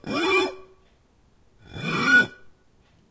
Washing a pyrex baking dish in soapy water, emphasizing the resonant qualities of fingers against wet glass. Recorded with a Zoom H2 in my kitchen. The recordings in this sound pack with X in the title were edited and processed to enhance their abstract qualities.